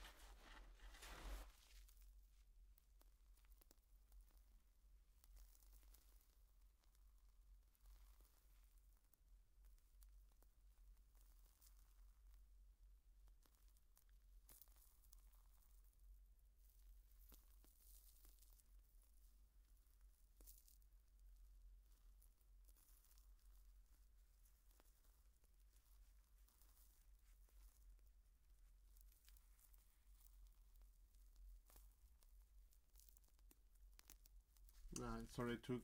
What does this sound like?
foley of sand falling on sand